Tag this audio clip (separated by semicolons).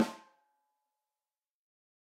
14x6; accent; beyer; breckner; combo; drum; drums; electrovoice; josephson; kent; layer; layers; ludwig; mic; microphone; microphones; mics; multi; neumann; sample; samples; shure; snare; technica; velocity